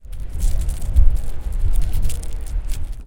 Cigarette paper being manipulated.